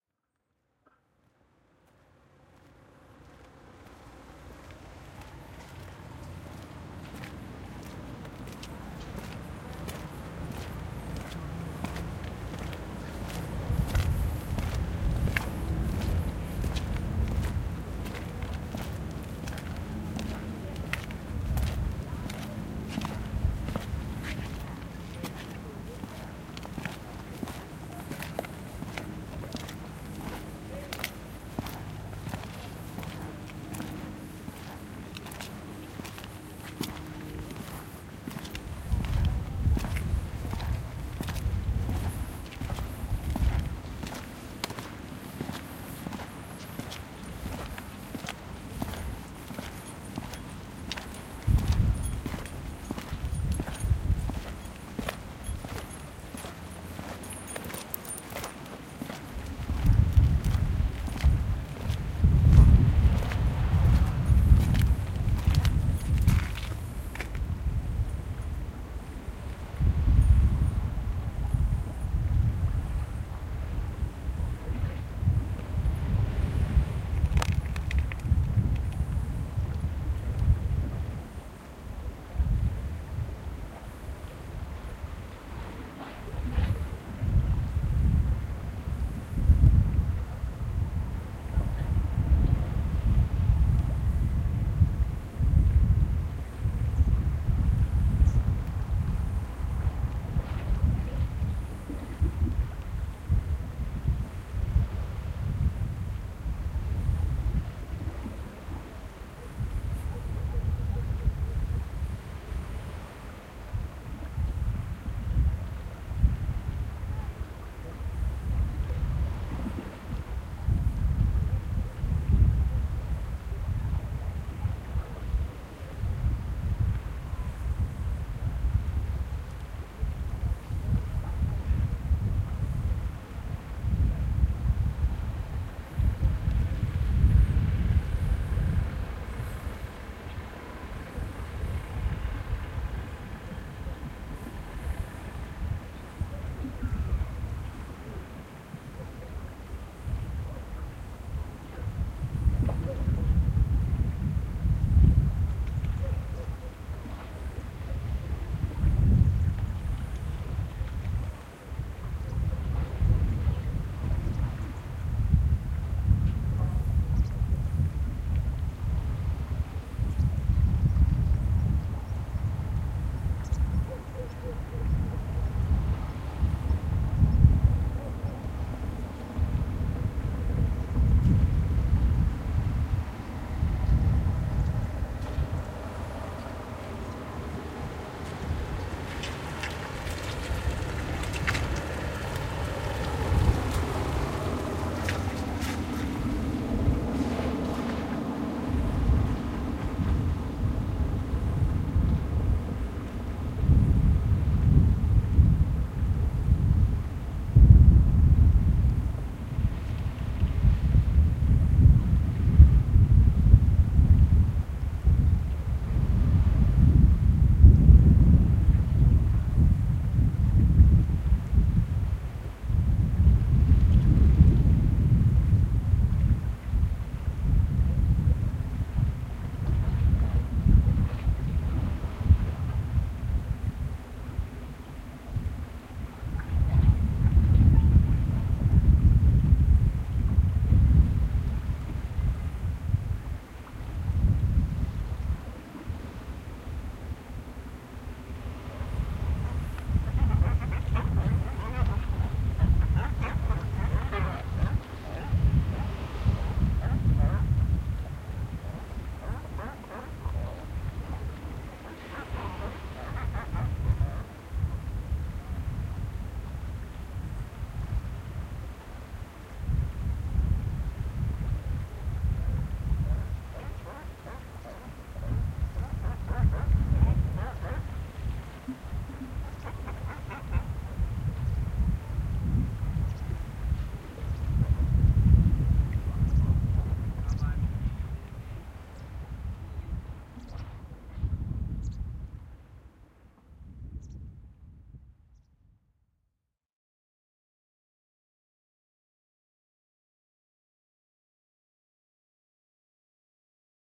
[005] walking around the harbor
date: 2011, 30th Dec.
time: 11:20 AM
gear: Zoom H4 + Rycote MINI Windjammer
place: Castellammare del Golfo (Trapani)
description: Walking around the harbor I stop at a point where the sound of cars and boats in the distance mingled with the sound of some aquatic animals. Recording very windy.
steps, boats, wind